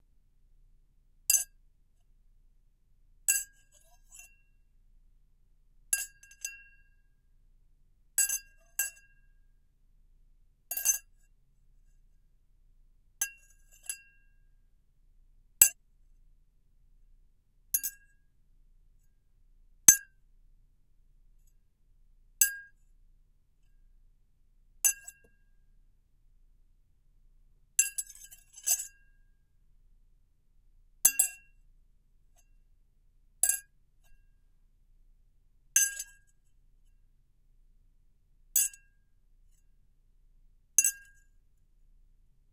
GLASS CLANKS
-Glass clanking and striking
clank, clanking, clanks, cup, glass, hit, hits, hitting, mug, strike, strikes, striking